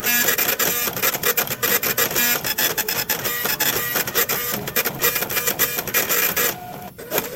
epson receipt printer7
this epson m188b printer is found in Manchester INternational Airport at a store in Terminal 3. It is printing out a receipt.
This can be used for a receipt printer, a kitchen printer, a ticket printer, a small dot matrix printer or a game score counter.
Recorded on Ethan's Iphone.
computer, ticker, electromechanical, robot, slip-printer, receipt, print, point-of-sale, mechanical, dot-matrix, kitchen-printer, robotic, business, technology, printer, printing, android, electrical, machine, computer-printer, game